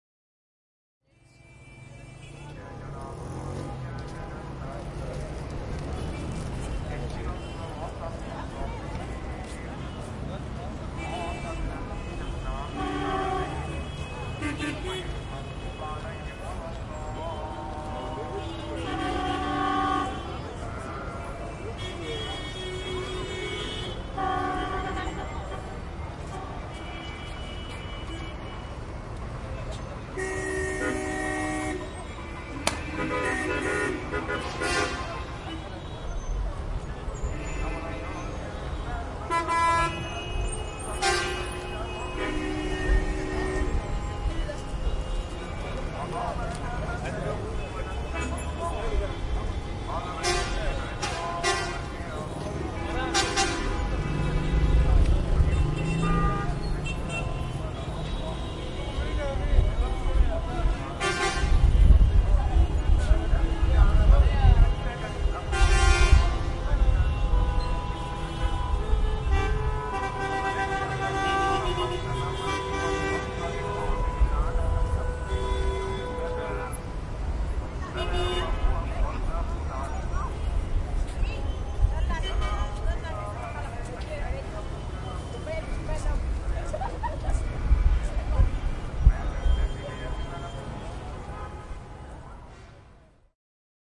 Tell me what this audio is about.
Cairo Traffic
2014/11/18 - Cairo, Egypt
At Metro Nasser square. Traffic at a crossroad.
Muezzin. Pedestrians. Wind.
ORTF Couple